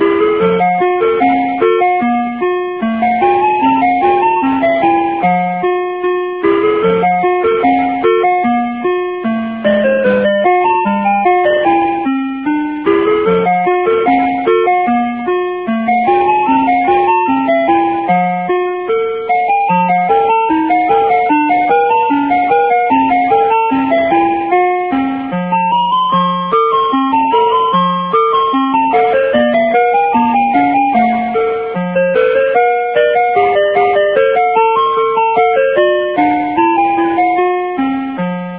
Entertainer holdon-song
this is a music piece, played in most callcenters Here In Slovakia during waiting for a particular person. Originally Entertainer by Scott Joplin